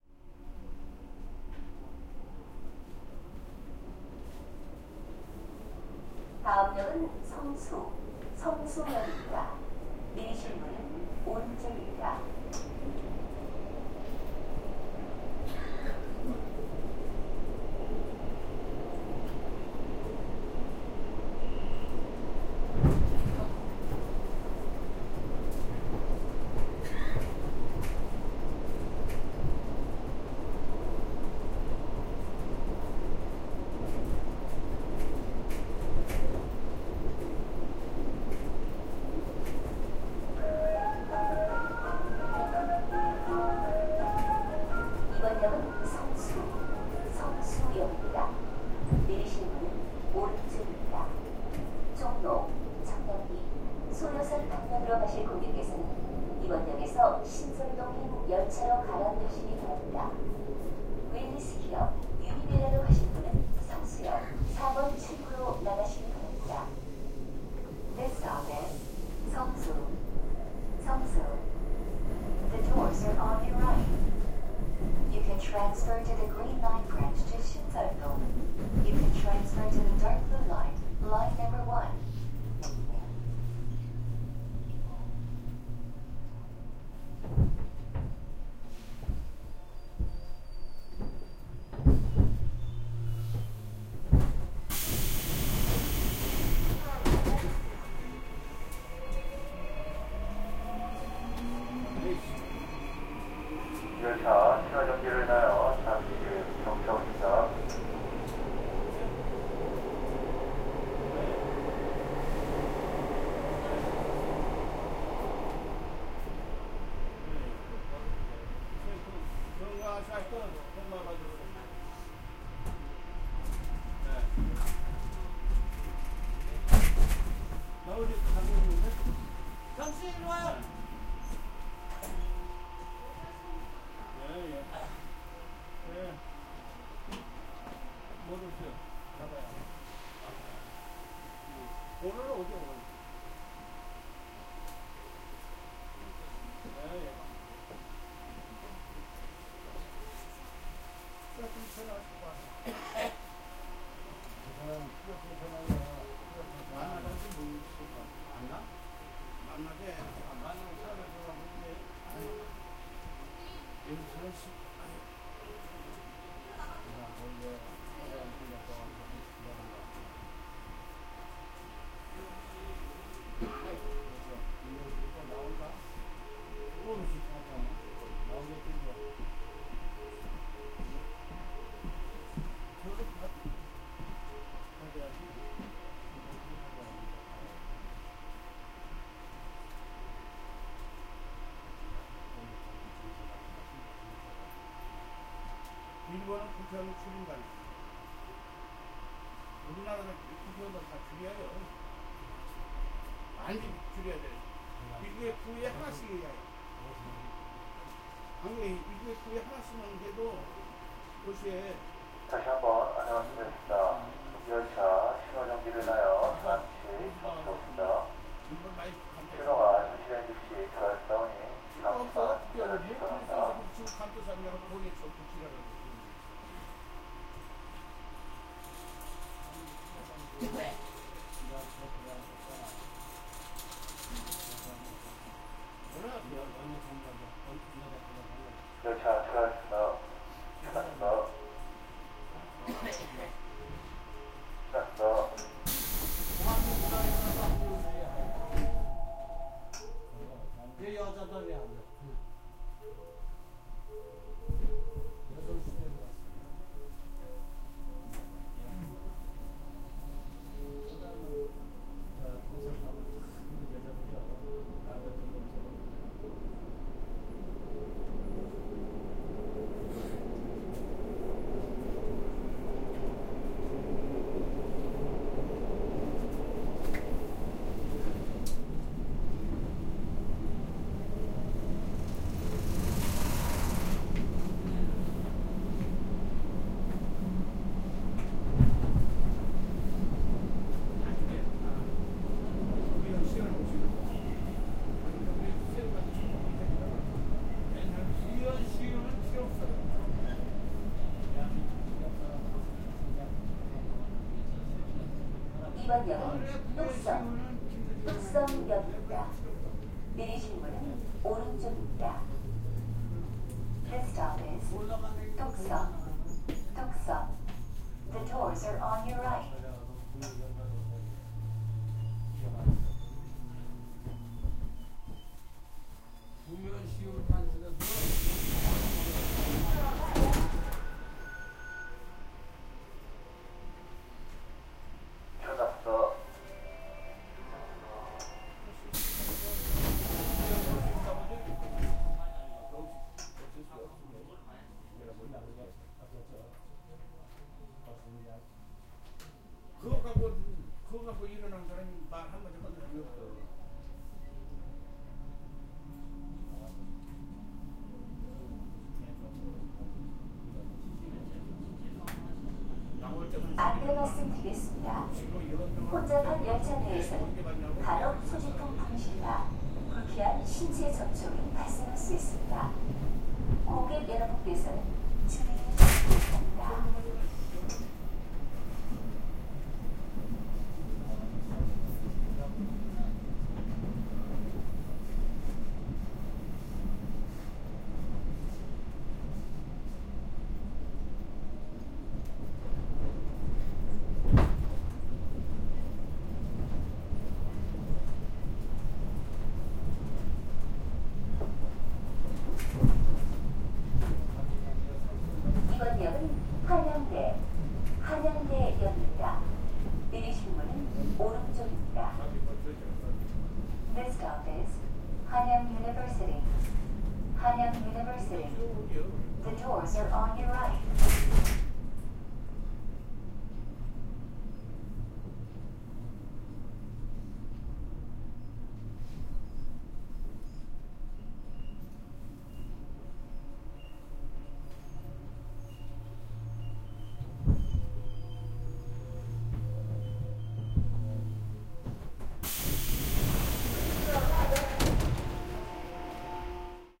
0074 Metro three stations
Metro trip. Metro information and music next station, Seongsu, Tukseom and Hanyang Univ., and transfer. People talk.
20120116
chinese, door, metro, field-recording, korean, english, alarm, voice, korea, seoul